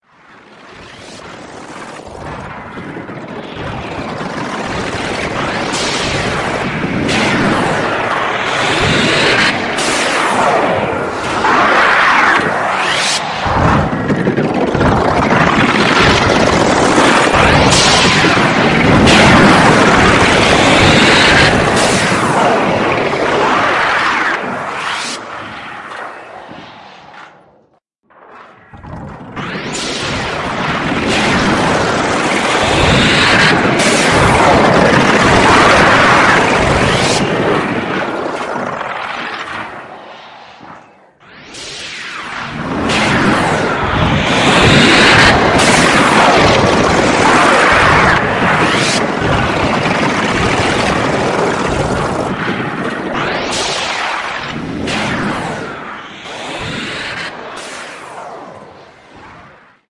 Hidden Track #26
All sounds and samples are remixed by me. A idea would be using this sound as a hidden track that can be heard on some artists music albums. e.g. Marilyn Manson.
alien, ambience, atmosphere, distortion, effect, electric, electronic, end, experimental, hidden, noise, processed, sample, track, vocoder, voice, weird